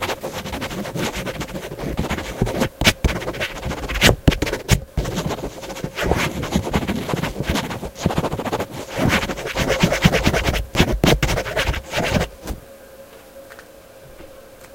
Quickly scribbling on pen with paper
Someone quickly dashing off a note for themselves!